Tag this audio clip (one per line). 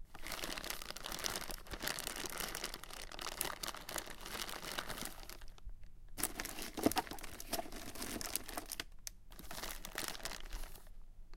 Plastic
office
foley
soundfx
rustle
rustling